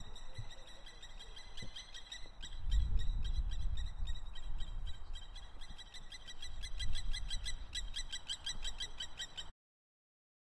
Kiwi Bird SFX
Kiwi Birds in my Garden going Crazy
Bird, Kiwi, Morning, OWI, Sounds